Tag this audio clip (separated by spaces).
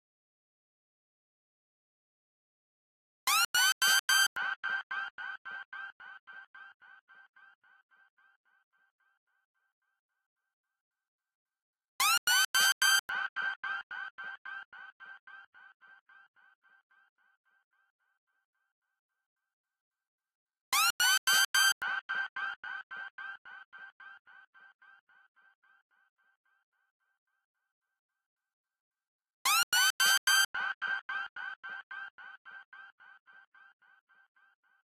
Hit Trap chop